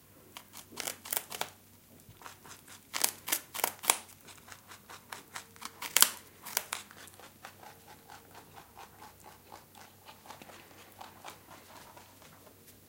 a rabbit nibbles a carrot. Sennheiser MKH60 + MKH30 into Shrure FP24, to Edirol R09. Decode to M/S stereo with free Voxengo plugin